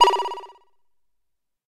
Analog Synth 01 D
This sound is part of a pack of analog synthesizer one-note-shots.
It was made with the analog synthesizer MicroBrute from Arturia and was recorded and edited with Sony Sound Forge Pro. The sound is based on a triangle wave, bandpass-filtered and (as can be seen and heard) pitch modulated with an pulse wave LFO.
I've left the sound dry, so you can apply effects on your own taste.
This sound is in note D.
analog, analog-synth, effect, electronic, fx, lfo, modulated, one-shot, pitch, synth, synthesizer